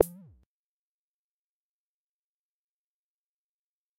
Tonic Electronic Snap 3
This is an electronic snap sample. It was created using the electronic VST instrument Micro Tonic from Sonic Charge. Ideal for constructing electronic drumloops...
drum, electronic